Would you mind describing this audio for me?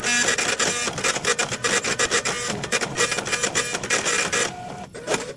this epson m188b printer is found in Manchester INternational Airport at a store in Terminal 3. It is printing out a receipt.
This can be used for a receipt printer, a kitchen printer, a ticket printer, a small dot matrix printer or a game score counter.
Recorded on Ethan's Iphone.